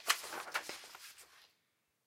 Page Turn
Sound of a page in a large book turning.
Recorded with an H2